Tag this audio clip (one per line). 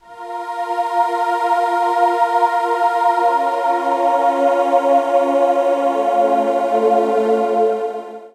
unison
ambiance
top
pretty
church
voices
ambient
cinematic
mystic
grand
texture
haunted
reverberated
dramatic
soundtrack
creepy
scary
atmosphere
moment
chorus
ghost
strange
sinister
choir
synthetizer
reverb
rising
synth
anxious